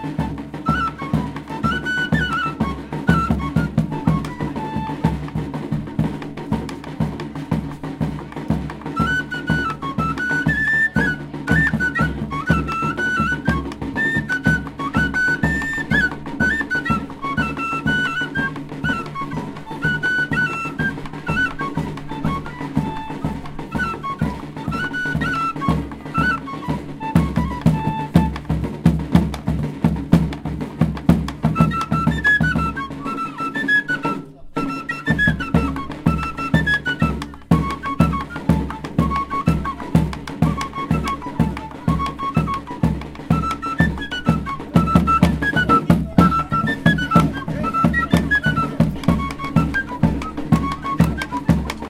A traditional band playing in Lamas, Peru
band, drums, flute, Lamas, Peru, tradition